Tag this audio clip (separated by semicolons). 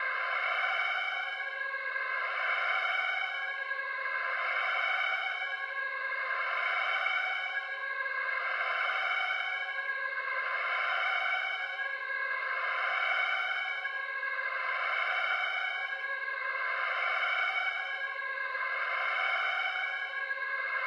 Technology; Alarm; Electronics